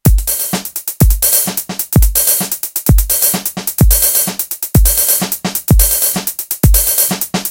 Drum loop played on Yamaha electronic drums, edited on audacity